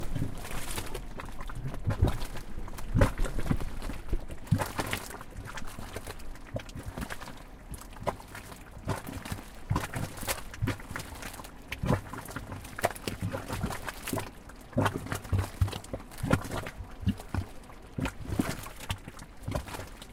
Boiling geothermal mud pool at Hverarönd near Mývatn, Iceland. Recorded July 2014.
geothermal, boiling, Myvatn, quicksand, vatn, bubbles, gas, clay, geyser, Hverarond, field-recording, M, lake, hot-spring, sulfur, sludge, goop, bubble, pool, Iceland, nd, mud, Hverar